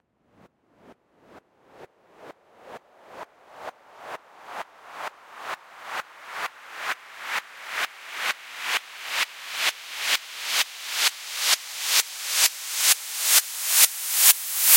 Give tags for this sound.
uplifter
fx

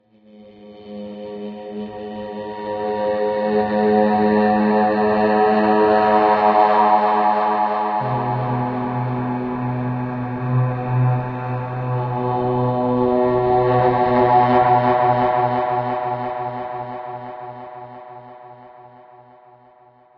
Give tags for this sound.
deep
terrifying
horn

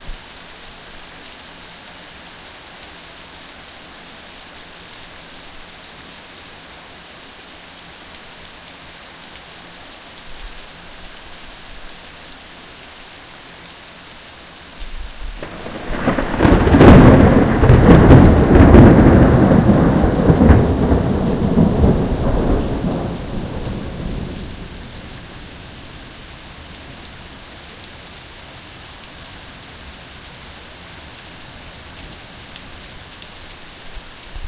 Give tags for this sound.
Storm
Thunder
Thunderstorm
Rain

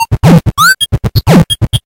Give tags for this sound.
electro
experimental
loop
resonance

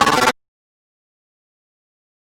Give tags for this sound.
ui
game
switch
option
menu
select
click
interface
button

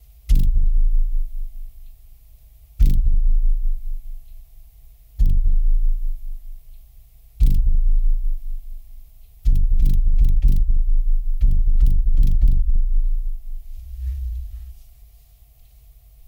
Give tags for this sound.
beat
bump
hard
impact
kick
percussion
punch

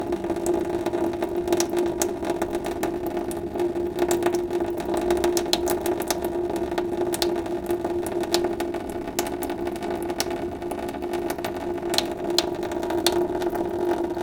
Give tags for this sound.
sink
water
tap
drip
field-recording

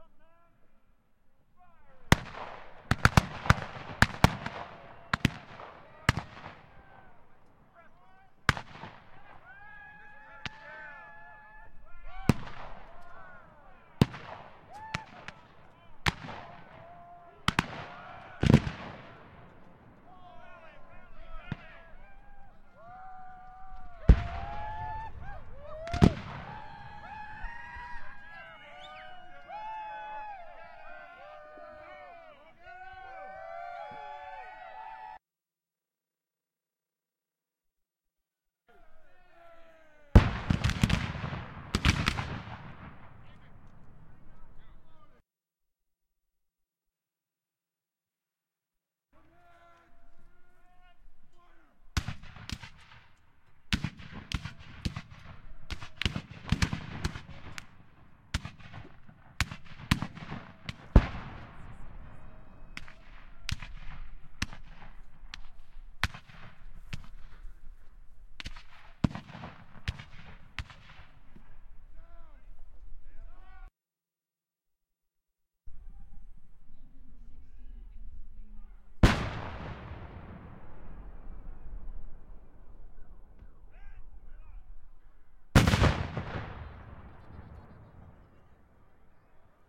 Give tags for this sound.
battle
civil
combat
firearms
historic
military
war

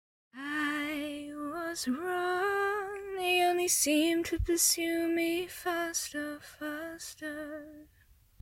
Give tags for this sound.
female
girl
lyrics
sing
singing
vocal
vocals
voice

